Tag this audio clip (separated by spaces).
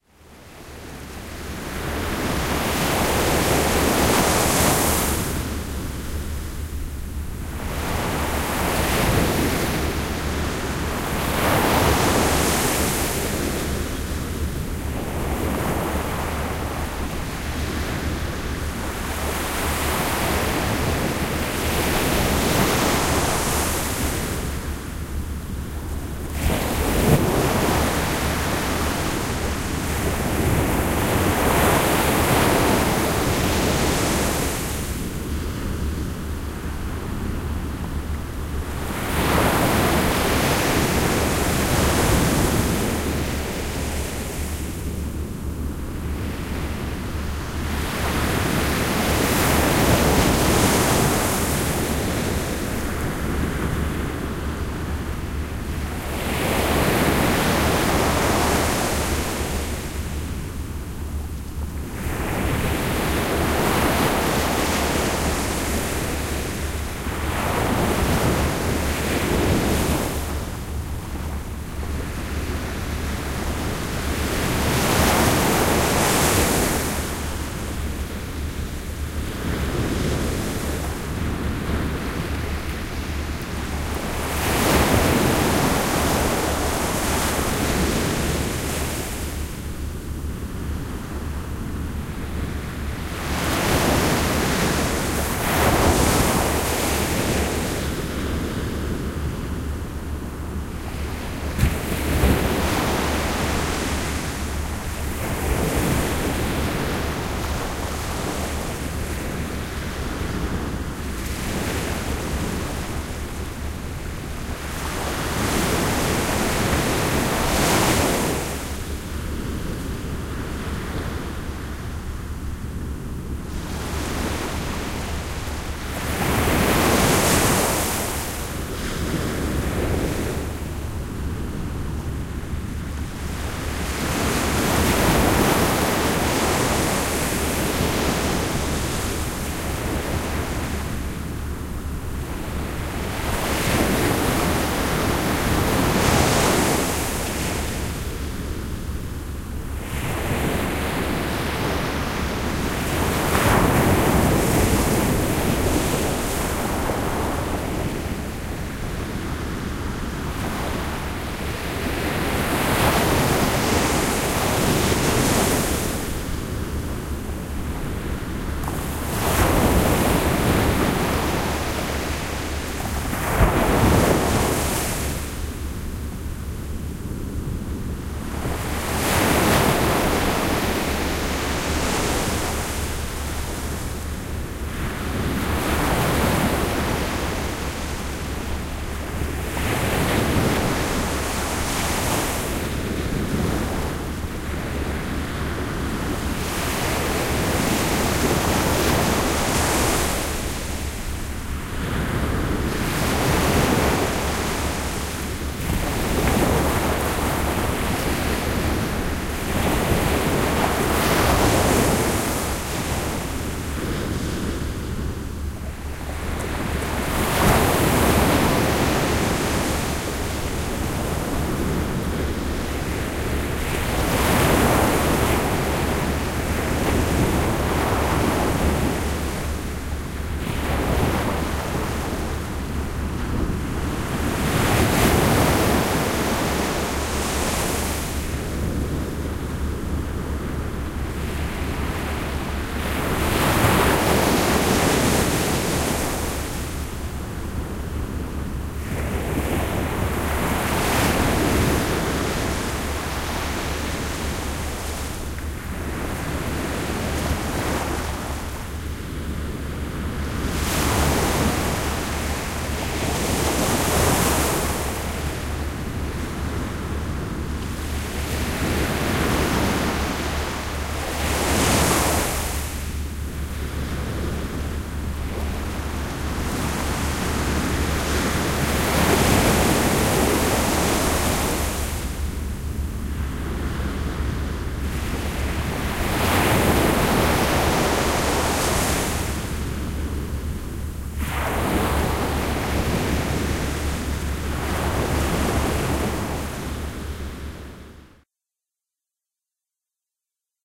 nature mask-noise noise meditation relaxing relaxation tinnitus-management water white-noise meditative ringing-in-ears beach insomnia sleep-inducement